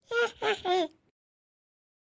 Risa Quisquillosa s
evil, Laughting, male